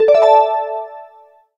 ding dong sound.